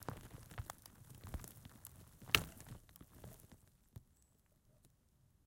splitting an old tree trunk, close up, one single crack, H6
break, breaking, crack, cracking, creaking, destroying, rotten, short, snapping, split, tearing, tree, trunk, wood